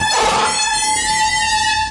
Alien lazer gun and alien strings? That is what it sounds like to me.
Maybe useful as it is for special effects, but most likely to be useful if processed further or blended dwith other sounds.
This is how this sound was created.
The input from a cheap webmic is put through a gate and then reverb before being fed into SlickSlack (an audio triggered synth by RunBeerRun), and then subject to Live's own bit and samplerate reduction effect and from there fed to DtBlkFx and delay.
At this point the signal is split and is sent both to the sound output and also fed back onto SlickSlack.
Ableton-Live, audio-triggered-synth, feedback-loop, FX, RunBeerRun, SlickSlack, special-effects